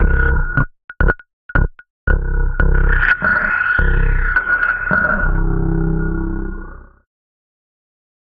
Broken Transmission Pads: C2 note, random gabbled modulated sounds using Absynth 5. Sampled into Ableton with a bit of effects, compression using PSP Compressor2 and PSP Warmer. Vocals sounds to try to make it sound like a garbled transmission or something alien. Crazy sounds is what I do.
ambient, artificial, atmosphere, cinematic, dark, drone, electronic, evolving, experimental, glitch, granular, horror, industrial, loop, pack, pads, samples, soundscape, space, synth, texture, vocal